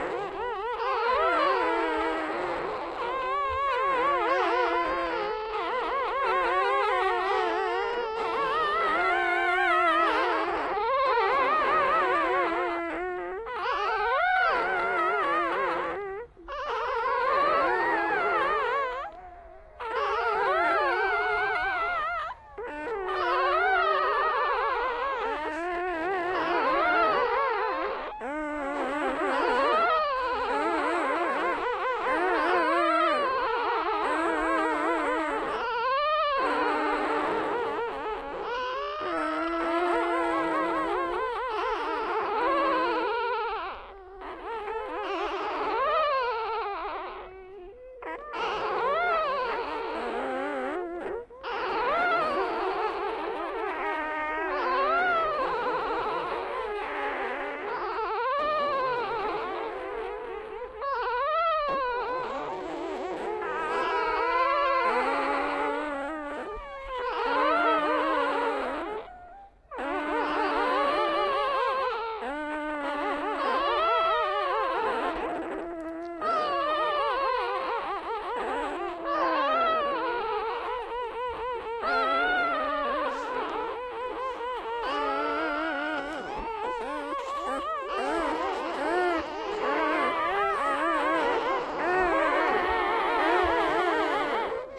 9 little ones
Our neighbors got 9 labrador retrievers yesterday, and this is what they sound like.
I think they sound more like dolphins than dogs..